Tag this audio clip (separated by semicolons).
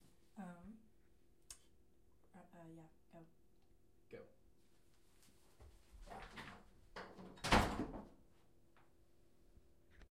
horror-effects; horror; door; creek